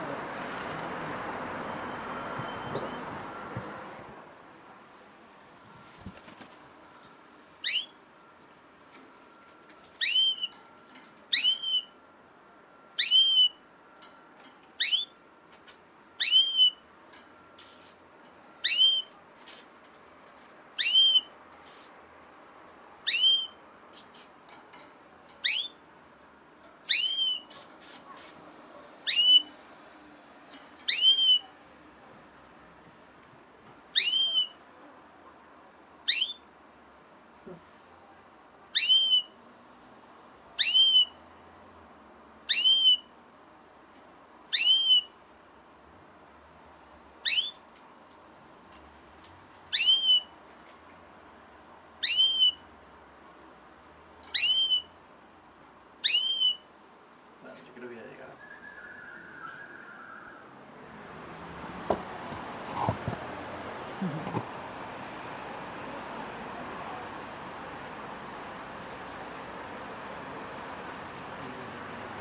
FX - pajaro domestico